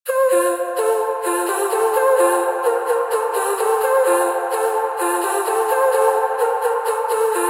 AI VOX Chop Noise
A nice vocal loop. Enjoy it!
Chill, Chops, EDM, House, loop, Vocal, vocals